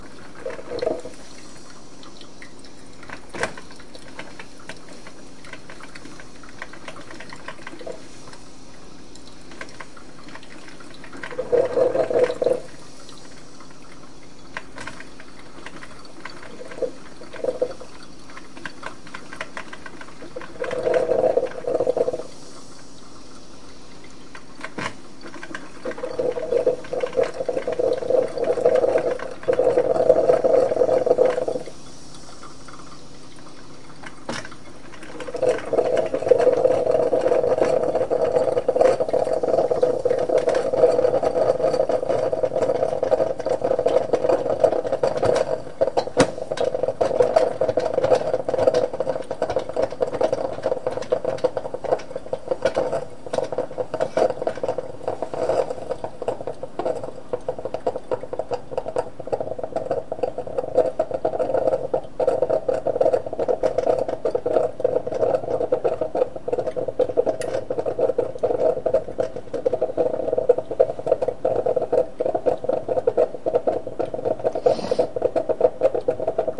Clip of a coffee maker in operation.
bubbing, water, maker, coffee, dripping, burbling